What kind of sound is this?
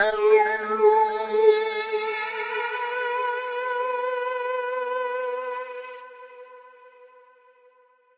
A mixture of a vocal cry and an oriental sounding string chord. Part of my Atmospheres and Soundscapes pack which consists of sounds, often cinematic in feel, designed for use in music projects or as backgrounds intros and soundscapes for film and games.
rhythmic, ambience, strings, electro, music, processed, atmosphere, oriental, synth